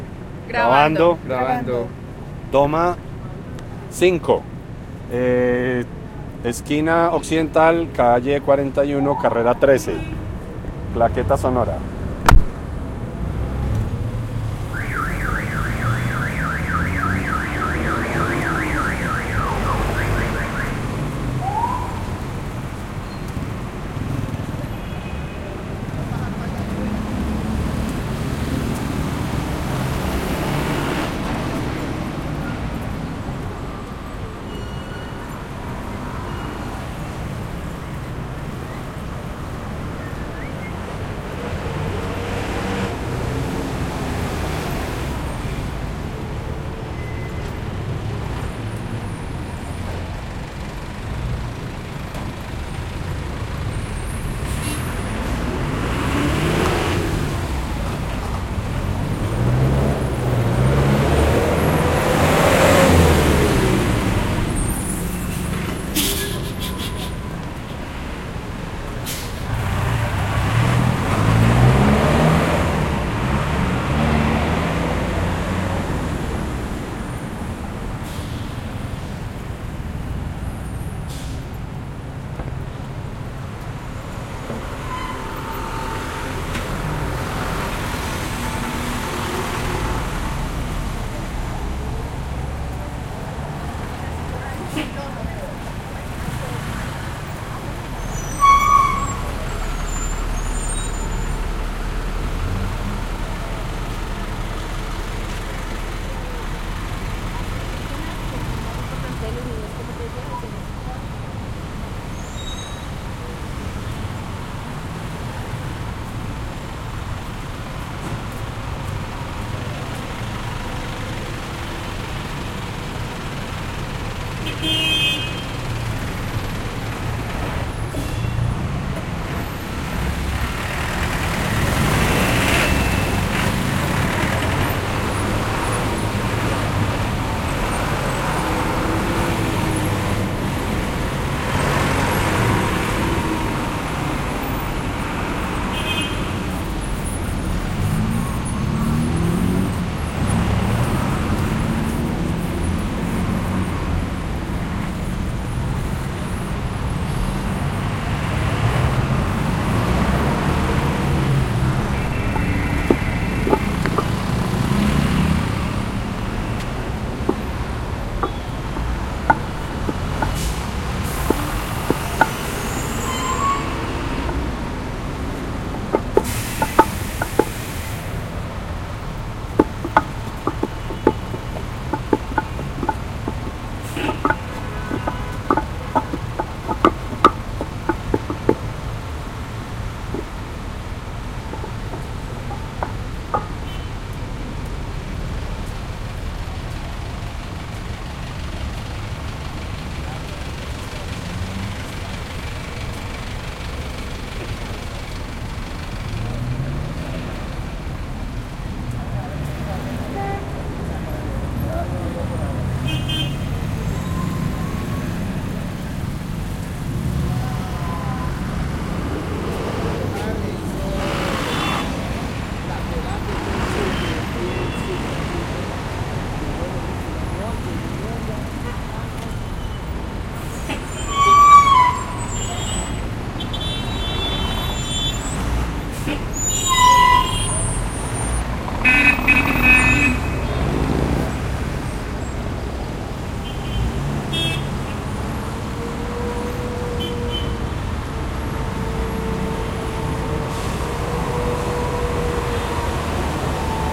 toma-05 roberto cuervo
Field recording of Bogota city in Chapinero locality, around 39 and 42 street, between 7th end 16th avenue.
This is a part of a research called "Information system about sound art in Colombia"
PAISAJE-SONORO, SONIDOS-PATRIMONIABLES, SOUND-HERITAGE, SOUNDSCAPE, VECTORES-SONOROS